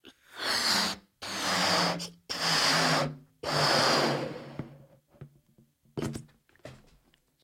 Inflating Balloon
Inflating a ballon by mouth
Balloon, Air, Inflate, expand